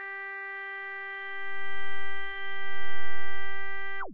Multisamples created with subsynth using square and triangle waveform.